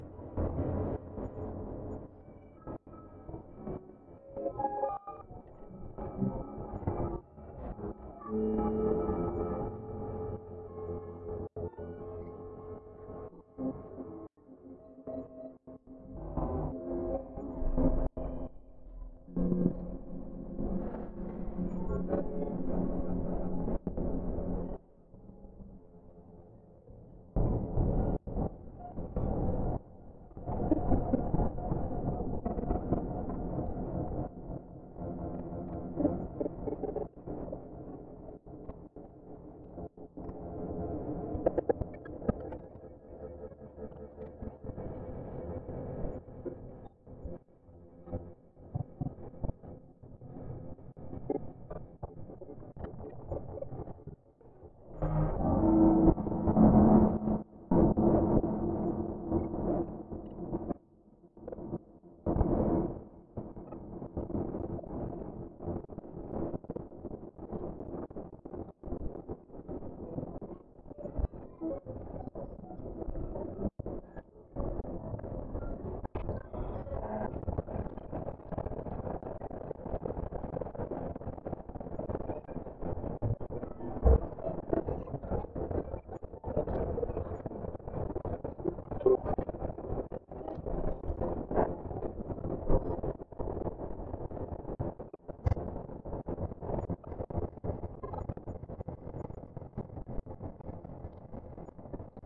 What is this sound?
Granular Storm
Granular synthesis.
Made by max msp.
alien, ambient, electronic, fx, granular, horror, max-msp, processed, pure-data, space, storm, synthesis